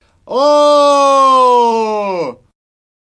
oooo admiration shout
666moviescreams, scream, funny, admiration